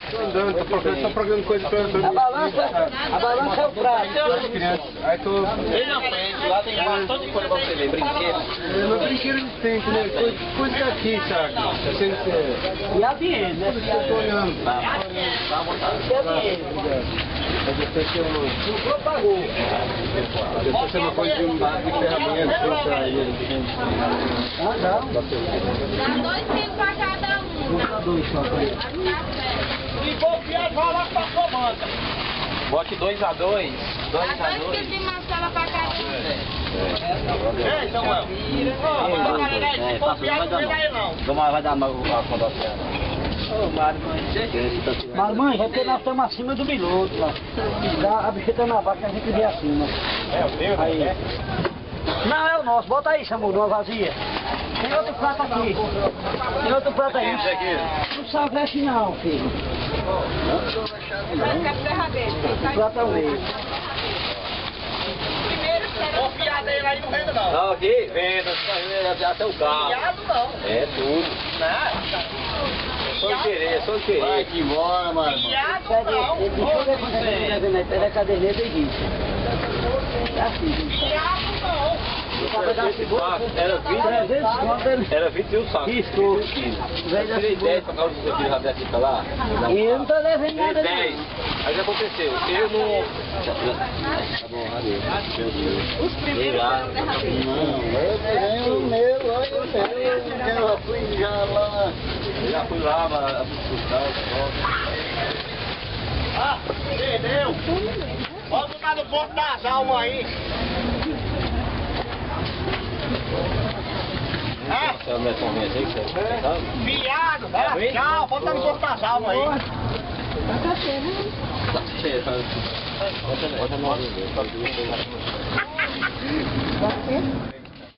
CRATO FEIRA
People buying spices on a quiet day at the streetmarket in Crato, Ceara Brazil-northeast
brazil, field-recording, market, portuguese, streetsellers, talking